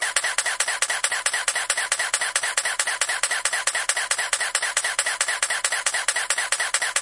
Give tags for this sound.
photo camera shutter photography

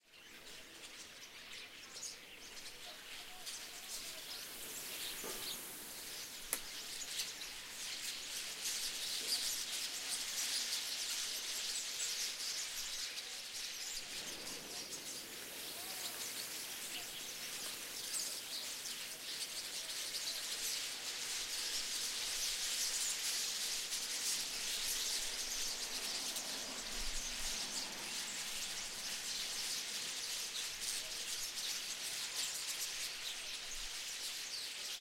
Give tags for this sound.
starlings birds ambiance bird field-recording squawk flying stereo murmuration tweet chirp birdsong ambient nature forest flock

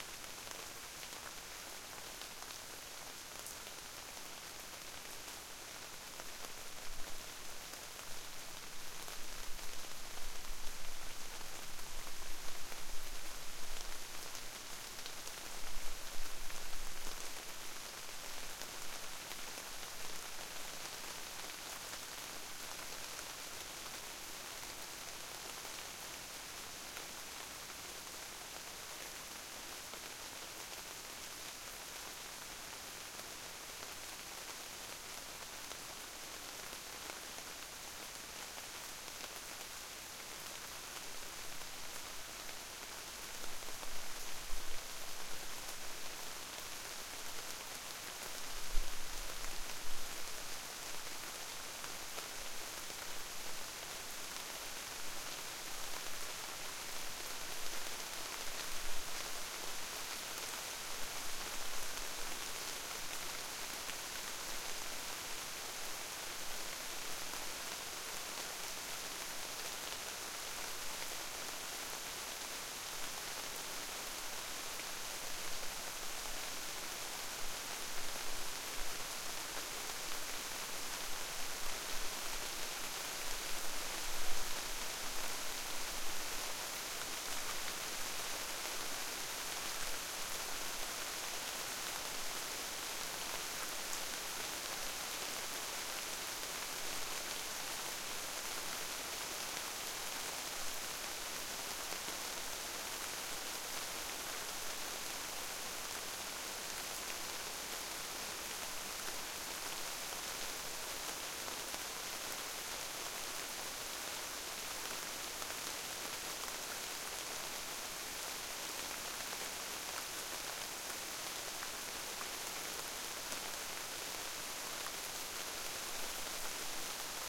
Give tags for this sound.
field-recording forest rain

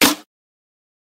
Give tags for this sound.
clap sample

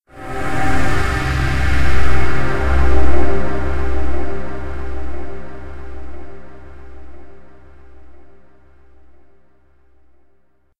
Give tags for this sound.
background choir granular pad processed

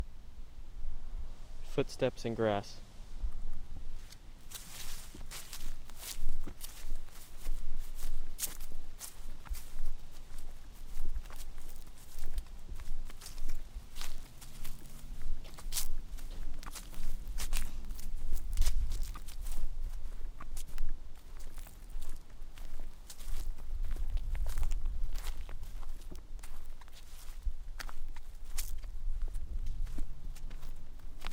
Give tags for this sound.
nature field-recording mono

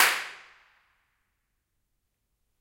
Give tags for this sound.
ambient atmosphere clap convolution-reverb echo filed-recording impulse-response reflections reverb smack

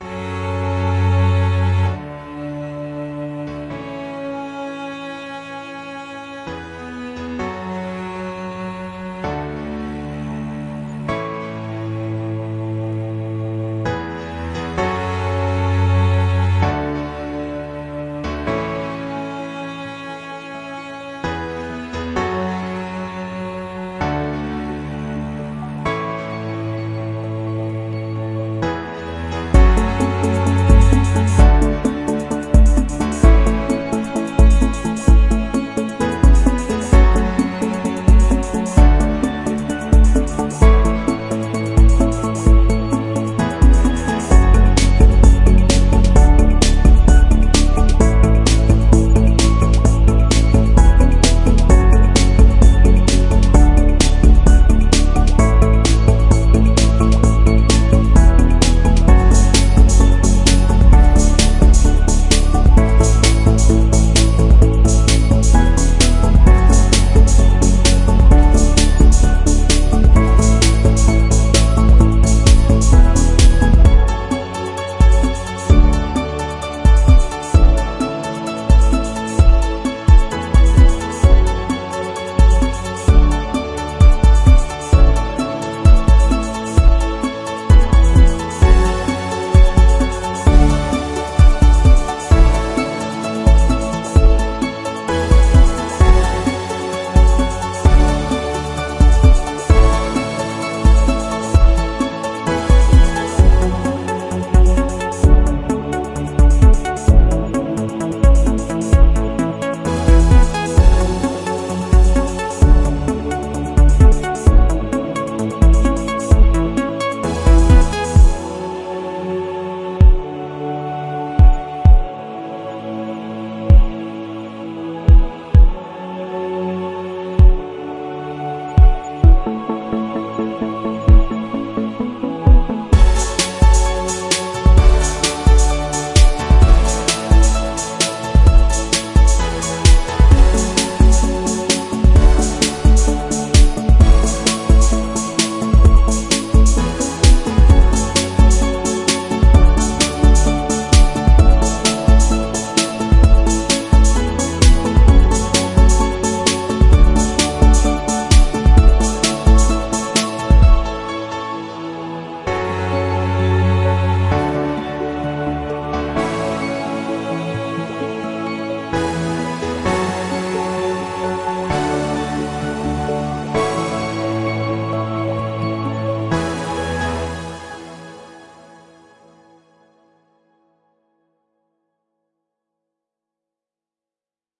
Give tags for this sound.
sci-fi soundscape dark sound-design soundesign sinister drone deep atmosphere music texture processed electronic ambience cinematic horror film score electro noise illbient suspence synth ambient thrill effect pad

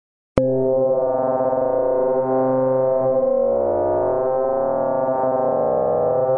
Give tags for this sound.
a c

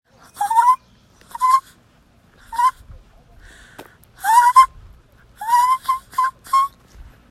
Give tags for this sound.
bird birdsong girl voice female woman woman-pretending-to-be-a-bird

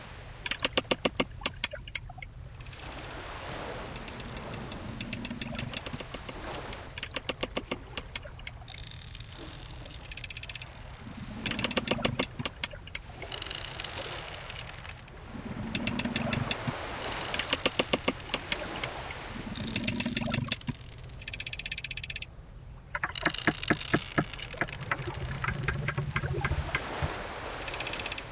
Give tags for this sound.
boat dock shore

